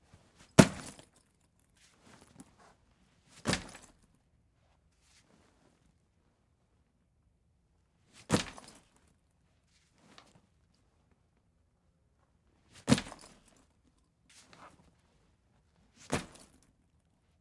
Backpack Drop
Dropping a backpack onto a chair